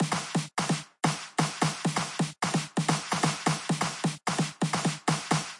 FL Drum Loop 02 version2
loops, fruity, drum, kick, soundeffect, fl, drums, library, beat, great, hat, short, fruityloops, simple, music, effect, bass, cool, awesome